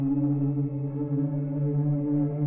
BarlEY Strings 3
New Orchestra and pad time, theme "Old Time Radio Shows"
ambient, background, oldskool, orchestra, pad, radio, scary, silence, soudscape, strings